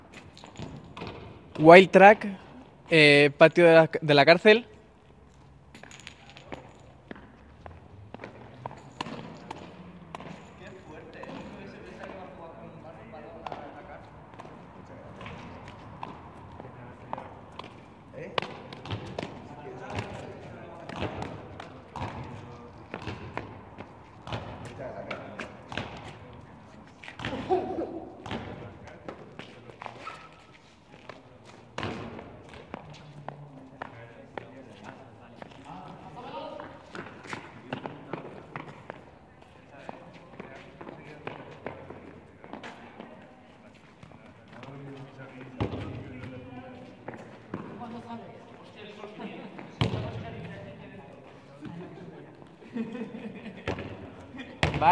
Wildtrack Prison
Sounds recorded from a prision.
ambiance, ambience, atmosphere, background, background-sound, basketball, general-noise, jail, people, prison